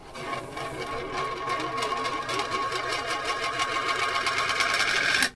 A thin metal disc of about 8cm radius spinning to rest on a wooden floor.
circle, disc, plate, roll, spin, wobble